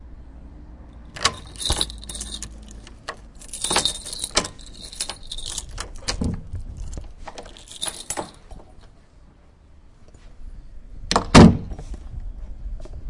SonicSnaps CCSP keydoor
Field recordings captured by students from 6th grade of Can Cladellas school during their daily life.
cancladellas, january2013, sonsdebarcelona, spain